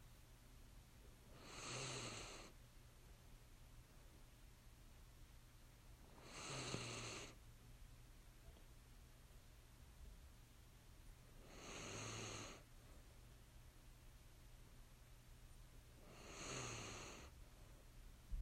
Small Dog Snoring
A terrier snoring.